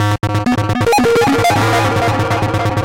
Busted Acid Noize 07
These short noise loops were made with a free buggy TB-303 emulator VST.
acid, circuit-bent, distorted, distortion, glitch, glitch-loops, loops, noise, noise-loops, noisy